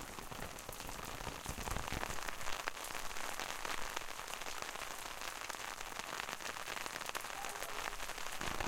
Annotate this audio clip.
outside-rain-medium-umbrella-dogbark-noloop
A medium rain falling on an umbrella. A dog can be heard in the background.
Recorded on a Tascam DR-07. This file is unlooped and has no processing.
atmosphere, california, rain, suburb, thunderstorm